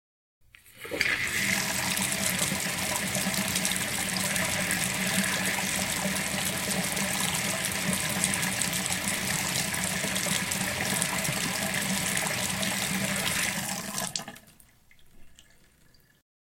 Bathtub Water Faucet
A bathtub faucet opened, water filling the tub, with out the drain plug, and then the water is turned off.